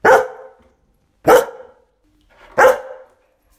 A dog is barking close to the microphone